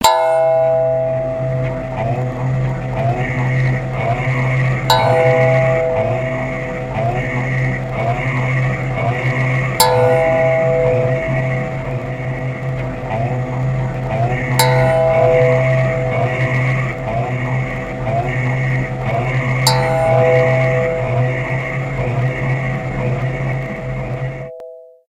1 voice chanting with multiple echo's, added bell from...